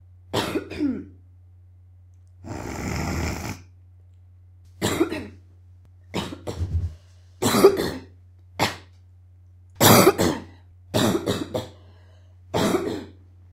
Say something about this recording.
Cold, Sick, Snort, Disgusting
My friend is sick. And making disgusting sounds. Ew. Ewwwwwww!
Recorded with a Zoom H2. Edited with Audacity.
Plaintext:
HTML:
sickness, disgusting, snort